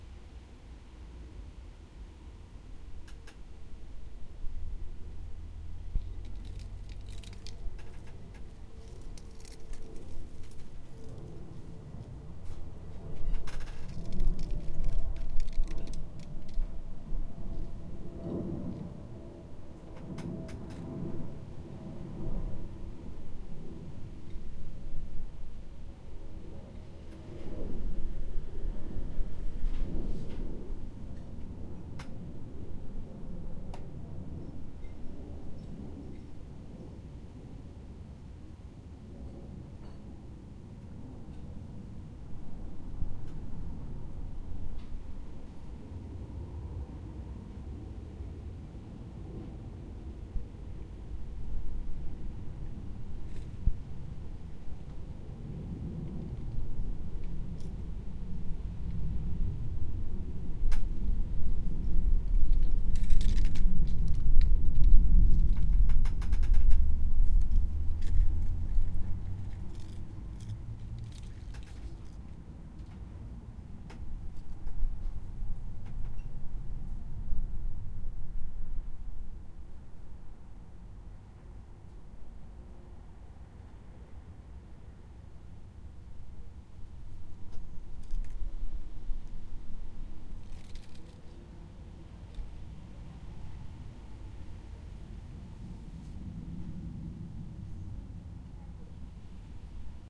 I set my Zoom H1 to record some very dry leaves dancing in the breeze and making a nice rustling sound.
The recording has quite a bit of ambient noise.
Recorded 22-Jul-2016.